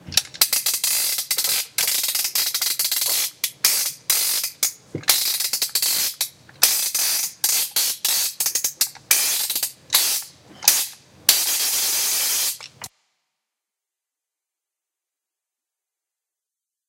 Partially loud clicking sound made using a toy. Recorded in a library, using a Mac's Built-in microphone.
click, toy, loud